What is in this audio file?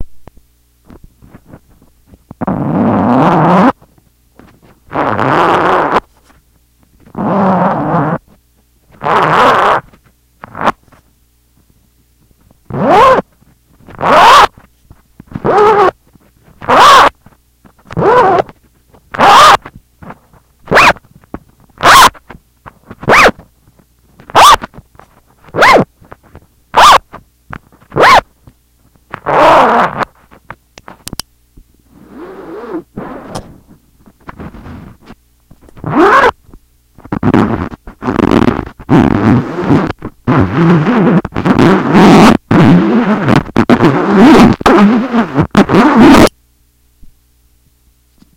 touching a zipper